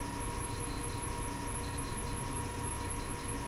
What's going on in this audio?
Machine squeal from behind a door. Microphone used was a zoom H4n portable recorder in stereo.
ambiance, city, field-recording